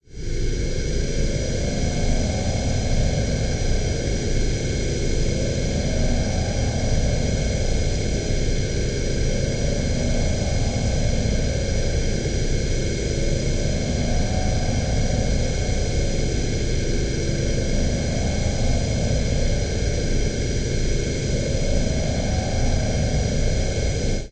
Made this Serum patch and used it to create the sound of a spaceship flying by. The results can be found in this pack.
I uploaded this source material before panning and distortion, so you can build your own fly-by. If you want the same distortion settings, just add Tritik's Krush plugin, use the init patch and turn up the Drive to about 60% and Crush to 30%, adjust to taste. Automate the Drive parameter to get that rocket exhaust sound!